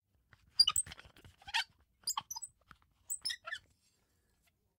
Squeaky Wheels
bike, squeak, wheels, truck, riding, wheel, squeaky